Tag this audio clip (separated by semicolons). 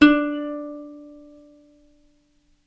sample; ukulele